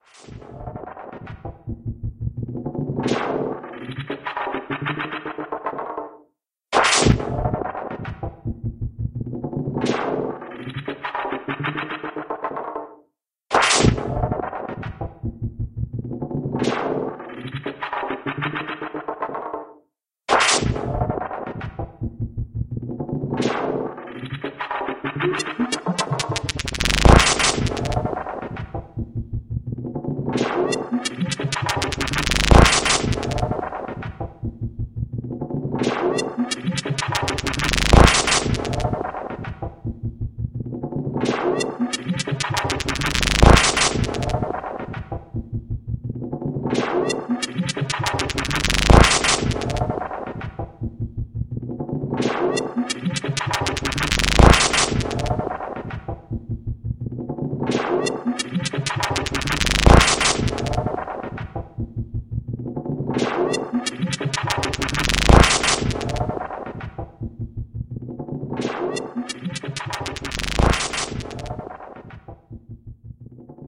Hidden Track #4
All sounds and samples are remixed by me. A idea would be using this sound as a hidden track that can be heard on some artists music albums. e.g. Marilyn Manson.
hidden, noise, voice, processed, alien, experimental, end, track, ambience, distortion, electric, atmosphere, electronic, weird, effect, vocoder, sample